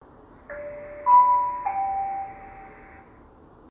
Uploaded on request - a chime that comes from a speaker inside Ferrograph branded PIS displays when a special message pops up on the screen; most commonly "stand well away", platform alterations or when a correction is made to the train arrival order. Commonly heard around railway stations in the south of England.